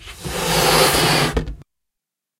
Balloon-Inflate-02
Balloon inflating. Recorded with Zoom H4
inflate, balloon